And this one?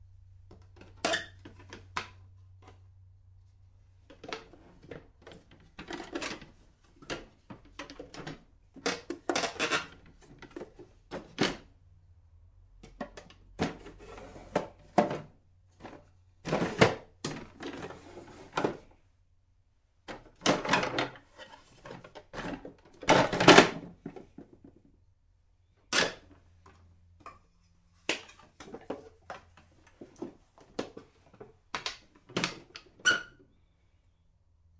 some opening and closing sounds of a squeaky bath cabinet. some of them are doors and some of them are drawers.